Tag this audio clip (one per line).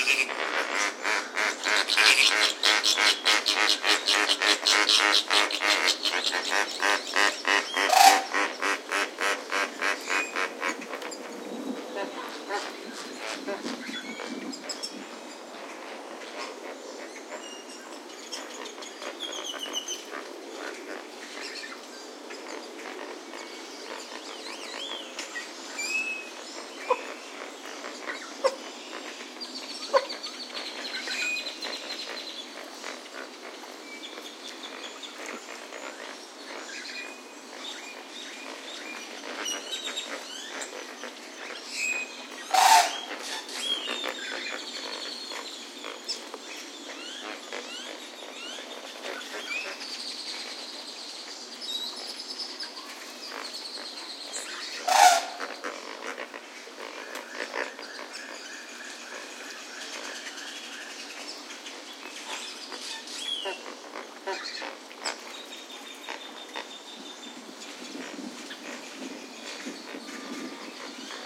waterbirds
marsh
wetlands
zoo
flamingo
aviary
crane
duck